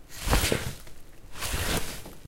cloth fold
Just opening and closing a bag.
cloth, clothes, fabric, fold, folding